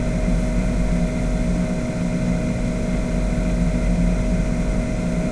Electricity generator loop
a looped recording of an electric junction box at the end of the street - it sounds similar to the noise i would expect to hear in an electricity substation or power plant.